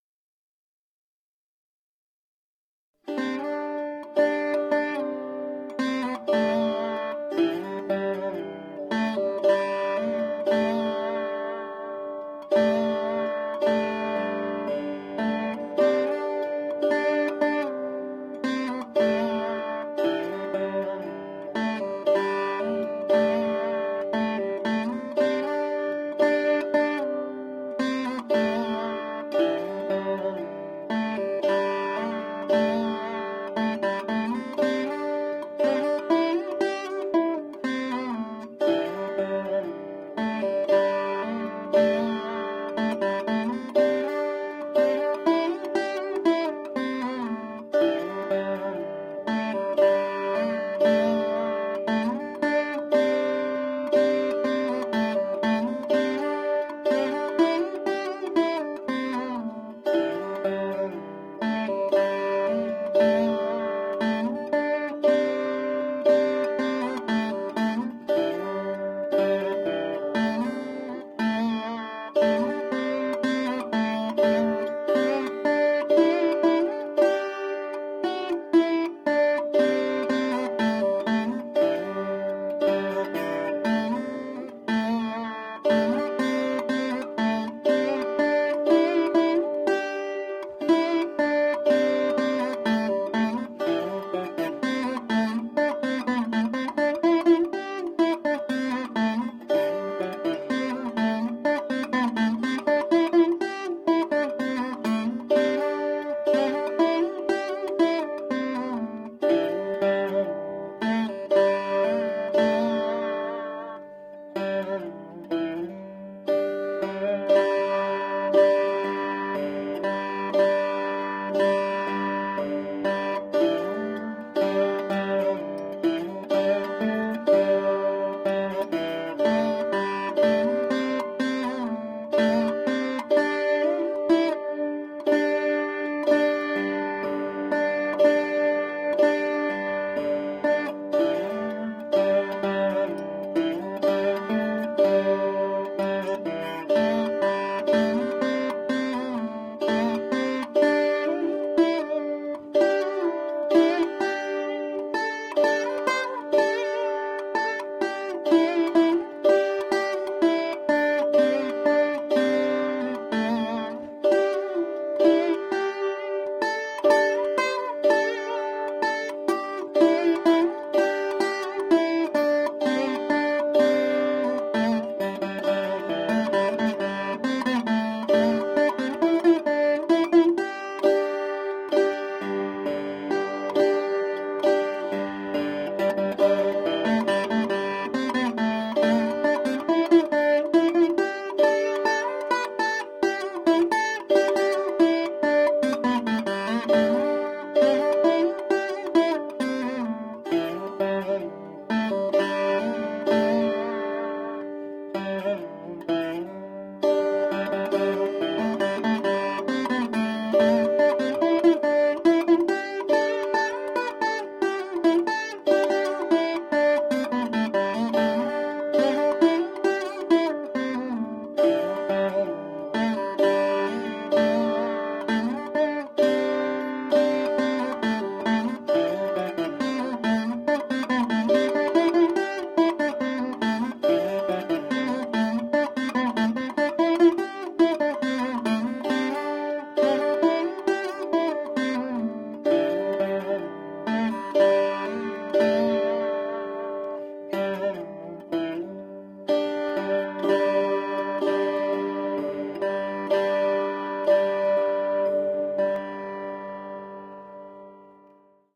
Veena Recording
A live recording of a student playing a veena during a high school variety show using cheep guitar pick-up, Countryman type 10 DI into a Allen & Heath iLive, recorded on a Tascam DR-40.
ethnic, India, instrument, live, music, recording, song, string, veena